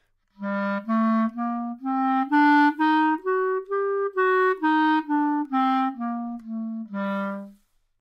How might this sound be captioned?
Clarinet - G natural minor - bad-dynamics
Part of the Good-sounds dataset of monophonic instrumental sounds.
instrument::clarinet
note::G
good-sounds-id::7879
mode::natural minor
Intentionally played as an example of bad-dynamics
clarinet, Gnatural, good-sounds, minor, neumann-U87, scale